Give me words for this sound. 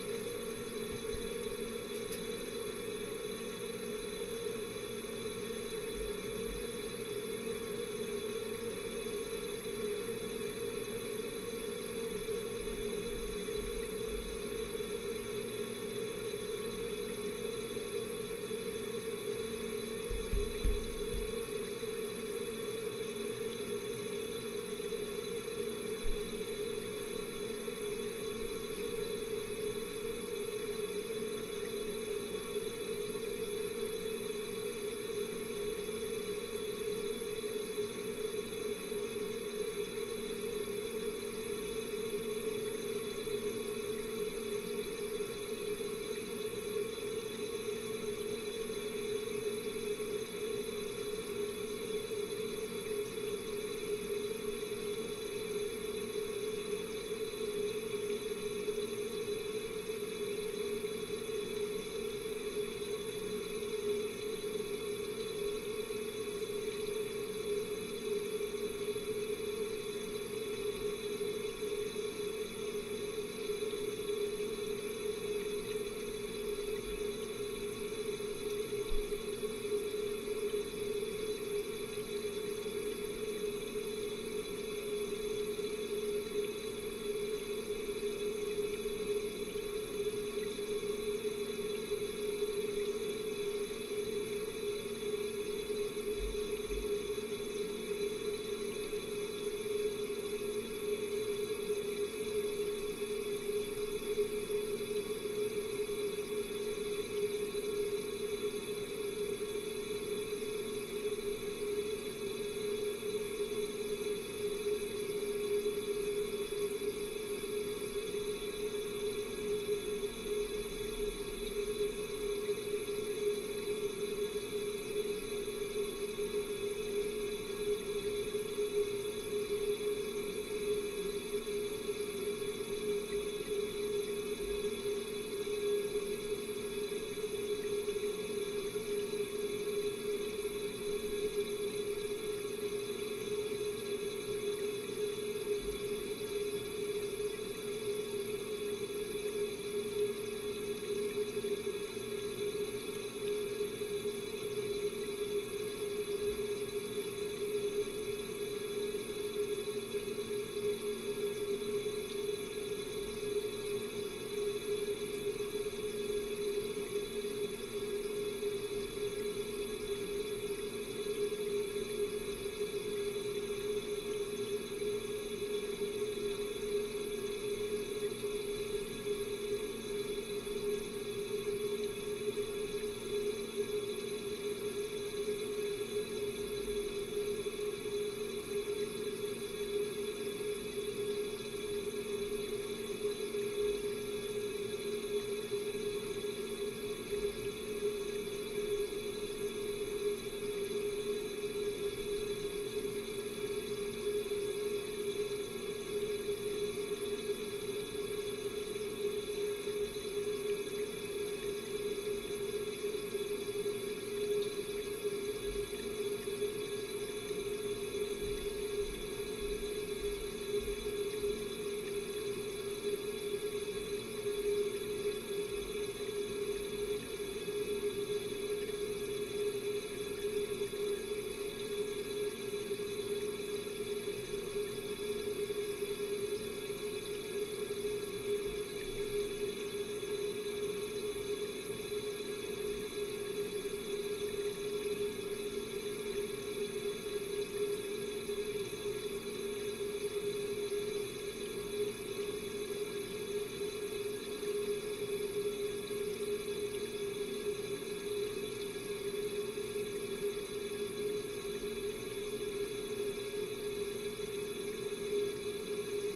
ATM CONTACT bathroom wall 2
Contact mic attached to a wall in a bathroom, you can hear many connecting water activities. Recorded on Barcus Berry 4000 mic and Tascam DR-100 mkII recorder.
atmosphere, flow, atmos, behind, wall, water, bathroom